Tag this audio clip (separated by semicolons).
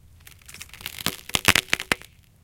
break; crack; foley; ice; ice-crack; melt